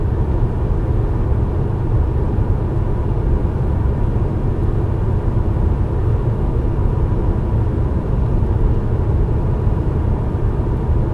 The fast driving of a diesel engine car (2007 VW Passat) recorded inside, with low mic position, near floor, with tire sounds, with a Zoom 4Hn in mono 16bit 44100kHz. Uncompressed.